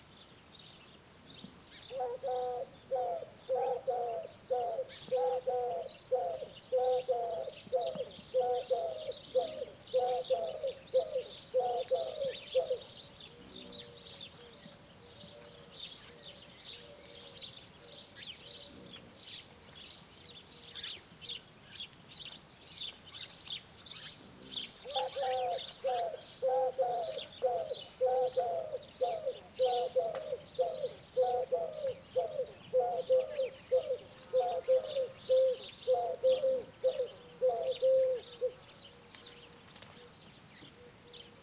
A Woodpigeon cooing
winter, nature, ambiance, birds, south-spain, forest, field-recording, pigeon